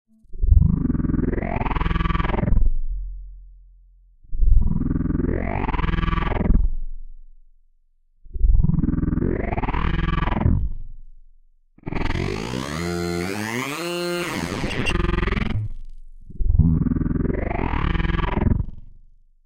Playing with formants
talking, synth